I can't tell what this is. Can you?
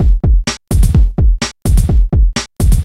A short Boards-of-Canada-inspired loop.
beat, loop